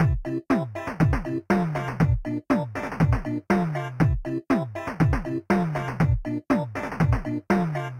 8 bit game loop 004 simple mix 3 short 120 bpm
bpm, electronic, gamemusic, loop, 120, bass, josepres, 8-bit, electro, loops, nintendo, gameloop, synth, game, free, beat, sega, 8-bits, bit, 8bitmusic, gameboy, 8bit, 8, mario, drum, music